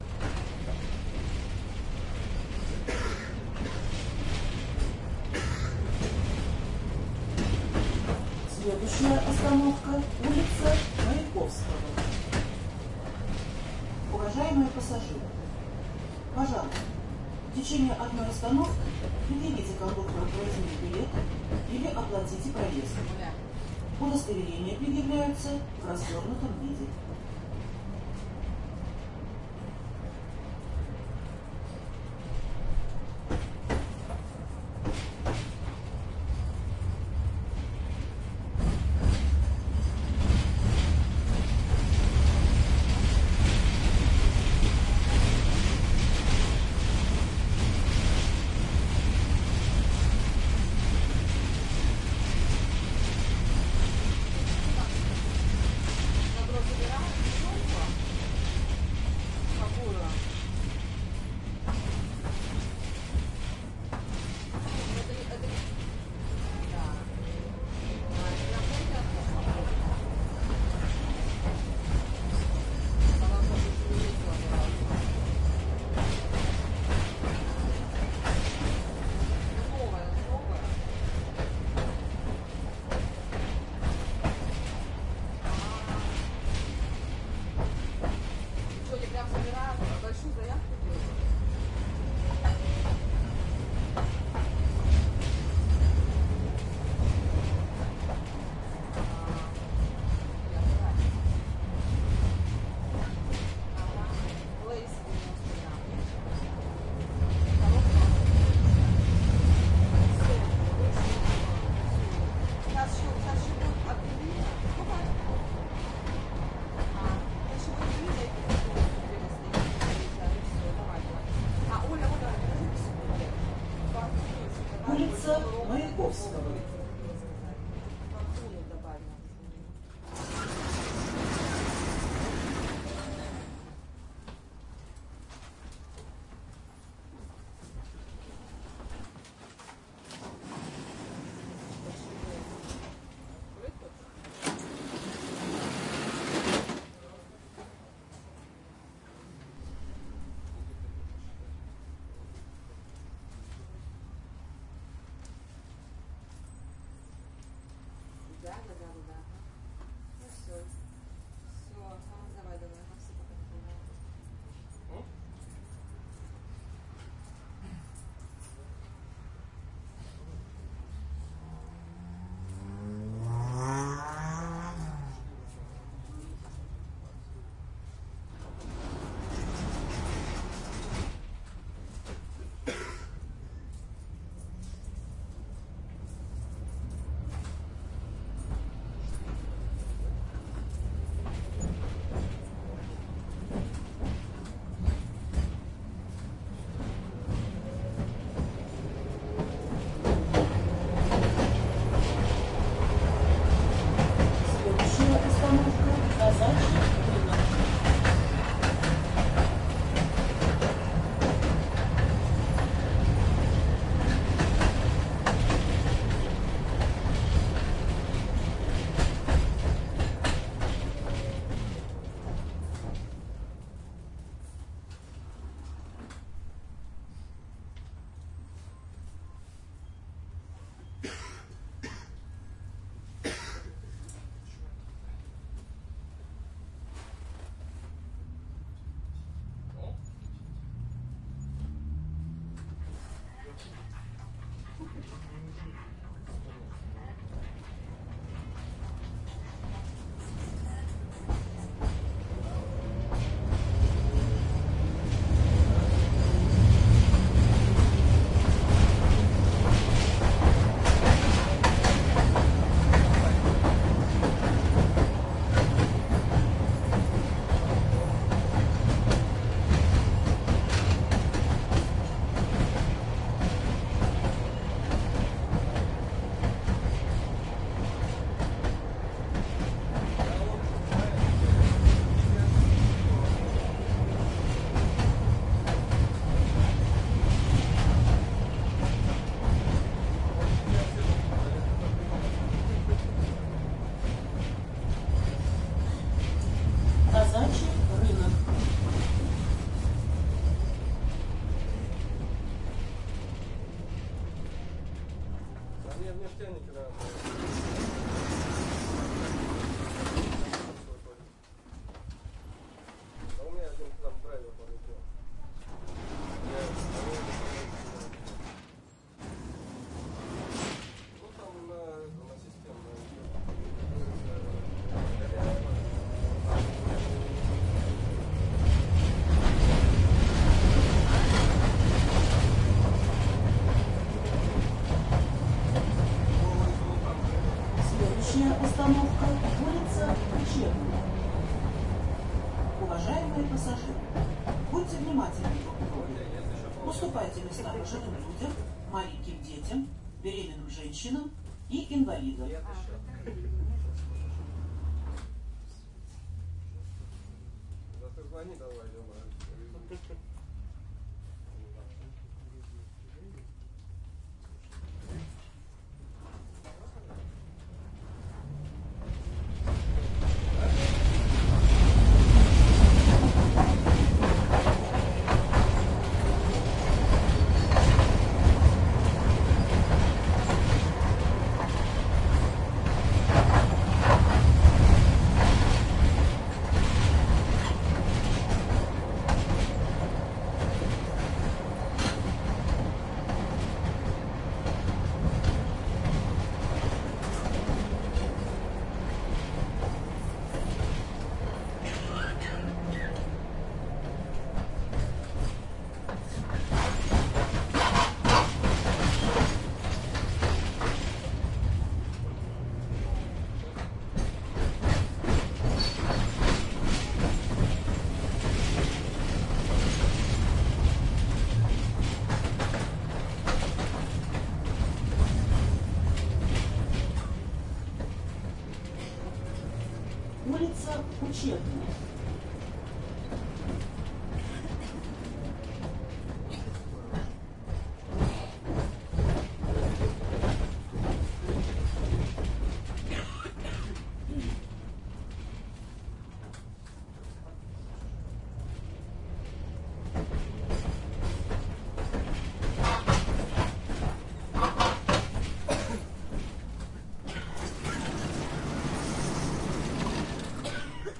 tram crosses the street (old surface car)
Old surface car in the curves.
Recorded 2012-09-25 02:15 pm.
AB-stereo
2012, bus-stop, city, noise, Omsk, passenger, people, Russia, russian-talk, Siberia, speech, talk, town, tram, transport, trip, urban, West-Siberia